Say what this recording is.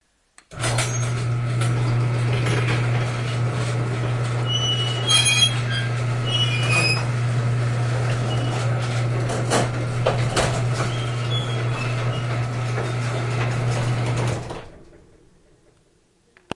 Squeaky Garage Door Open
Recorded with a black Sony IC voice recorder.